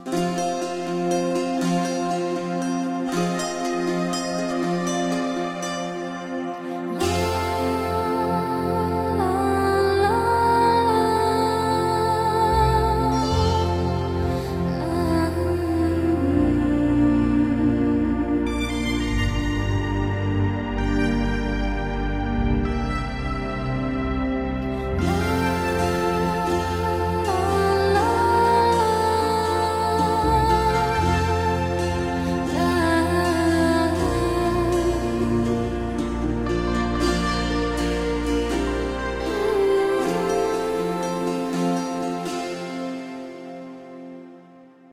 Thanx to digifishmusic!